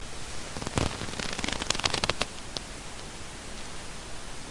fist clenching (6)
Just made some anime style fist clenching sounds cause I wasn't able to find it somewhere.
anime, clenching, clenched, fight, anger, fist, clench